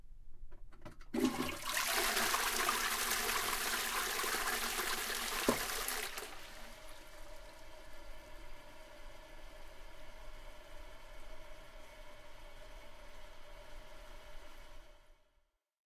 Flushing toilet
Recording of my toilet being flushed.